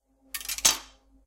dejando cuchillo
That's the sound of leave a butcher knife. Recorded with a Zoom H2.